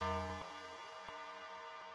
Metal'ish sound. It seems its far away.
metal, steel, unison